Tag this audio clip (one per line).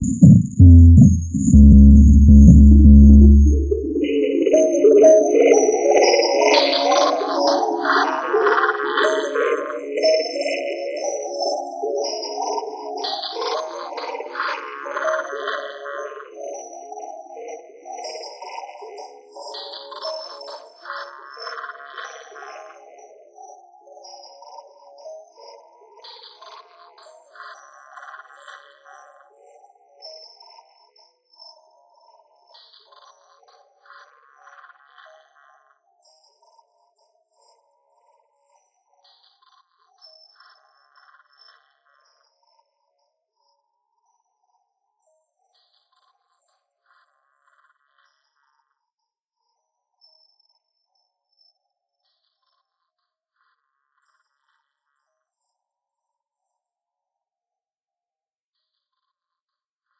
bpm
delay
distortion
loop
rhytmic